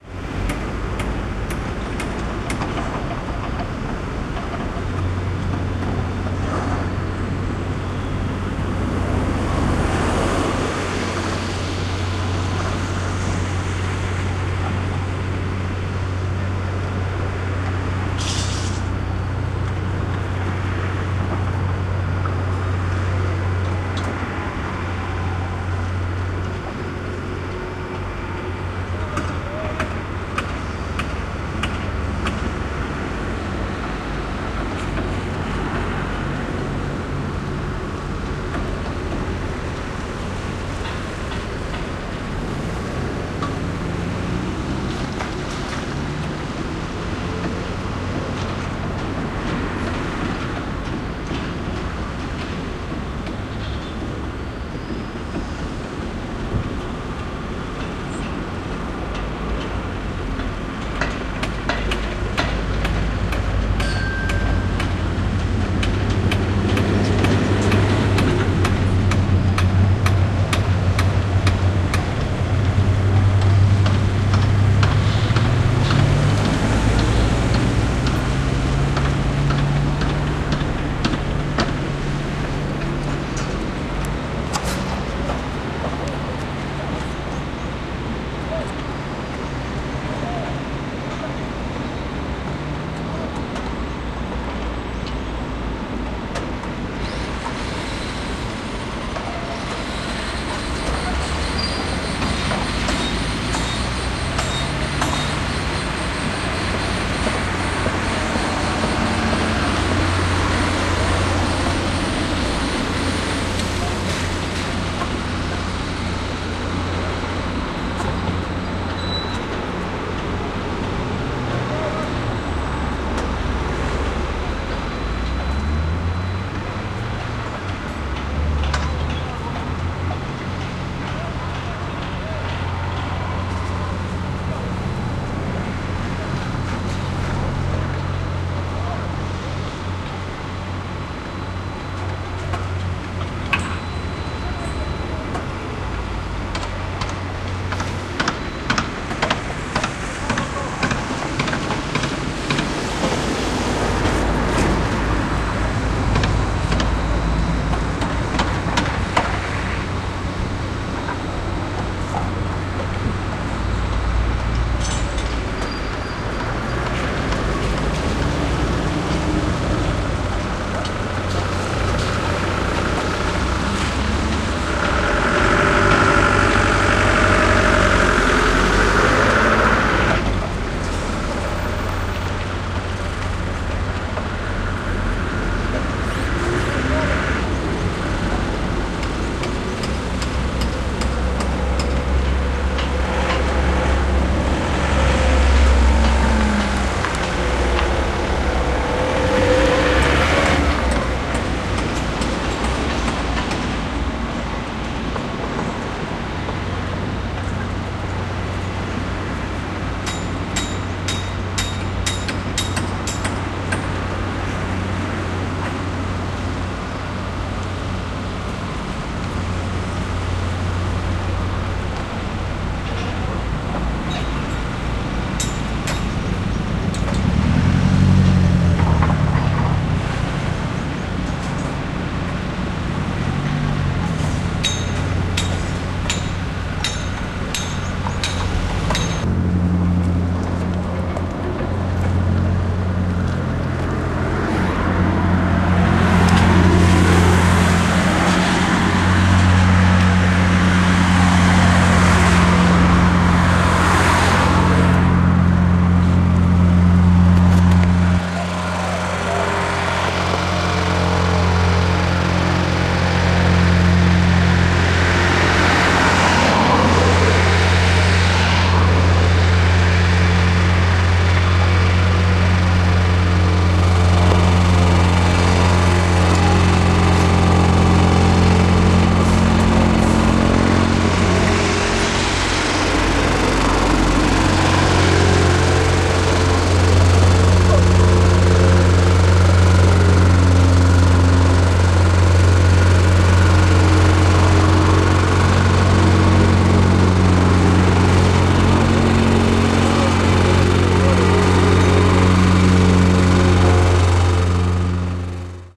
construction site 2
Many noises from the construction site.
machine, construction-site, work, machinery, ambient, noise, building, field-recording